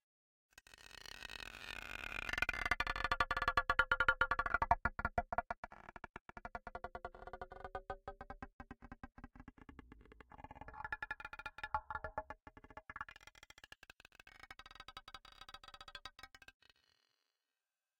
Electro stone 5
abstract, Alien, design, digital, effect, electric, Electronic, freaky, future, Futuristic, Futuristic-Machines, fx, lo-fi, loop, Mechanical, Noise, peb, sci-fi, sfx, sound, sound-design, sounddesign, soundeffect, Space, Spacecraft, Stone, strange, UFO, weird